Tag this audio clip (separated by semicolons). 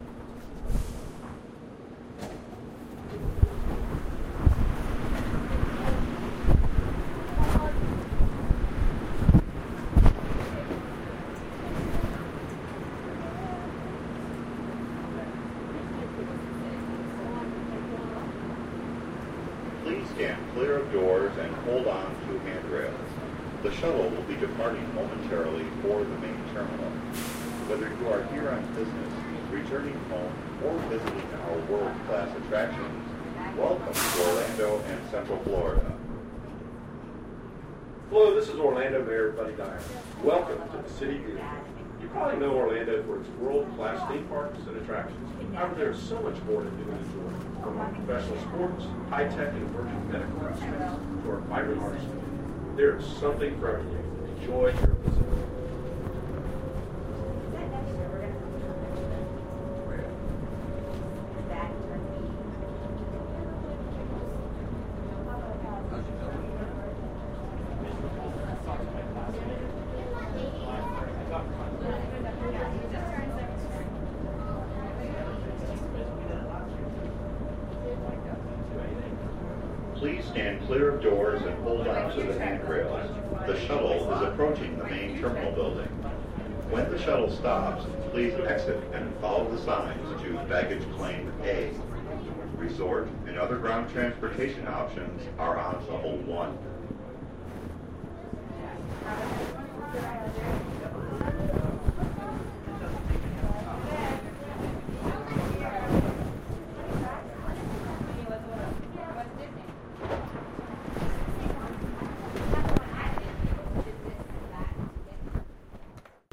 Tram; Ride; Gate; Terminal; Field-Recording; Transportation; Shuttle; Baggage; Airport; Claim; Orlando